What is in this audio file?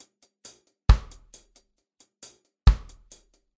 Reggae drum loops
loops, drum, reggae